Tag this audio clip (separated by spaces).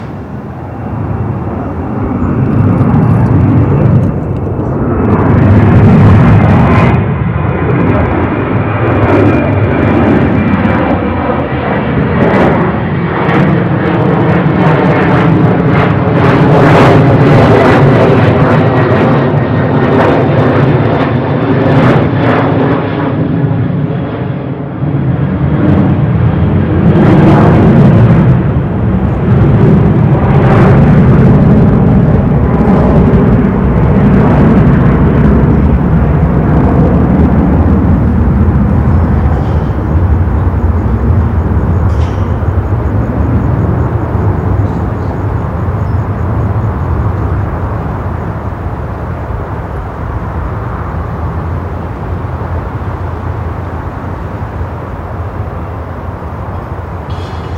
airplane congonhas aircraft